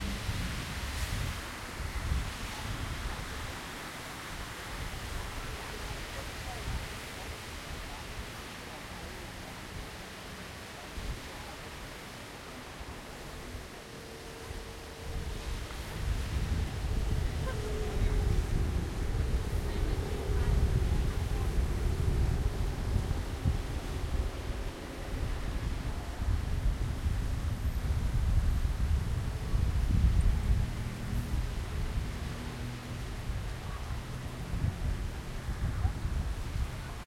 Road Noise Rain Victory Monument Bangkok 2
Zoom H1 Standing above road while raining getting traffic around Victory Monument Bangkok
street, rain, traffic, urban, street-noise